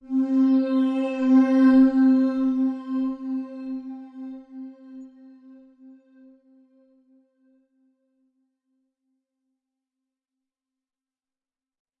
Synth+RevbSmall+FlangerWaving+PolyChorus
Sound created with FL Studio, basic effects, others plugins